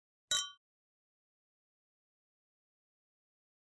Glass cups clinking
brindis, cheer, clinking, Cups